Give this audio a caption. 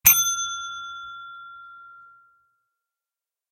bell ding 2
Ding sound of a pet training bell.
Recording device: Blue Yeti